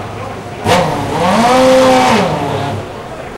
F1 BR 06 Engine Starts 3
Formula1 Brazil 2006 race. engine starts "MD MZR50" "Mic ECM907"
racing, vroom